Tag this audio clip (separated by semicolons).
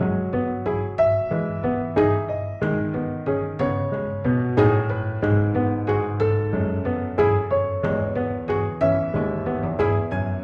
acoustic; loop